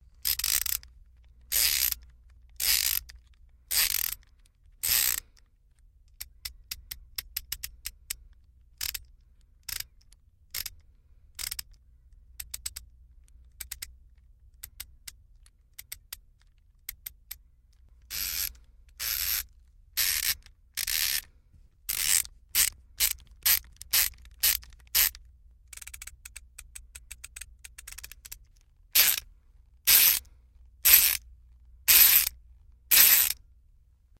Ratchet Screwdriver
Various sounds from a ratchet screw driver.
screwdriver, ratchet, tools, socket, tool